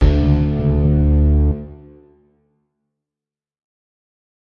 A mix of horns, guitars, electric pianos, and bass instruments. I made this in Garageband. It sounds like a 'BWAAAM' from the film 'Inception', but with more guitar and bass mixed in.
nolan, Christopher, hans, film, horn, inception, guitar, zimmer, foghorn, cinematic, ludwig, trailer, bwaaam, movie, goransson, epic, dramatic, awesome